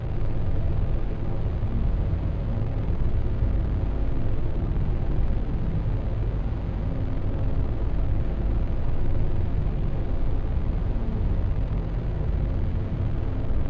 Viral Suspended Terra
Layers of synths stretched, distorted and granualized